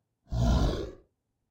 Deep Groan 1
Deep Groan Creature Monster
Creature, Deep, Groan, Monster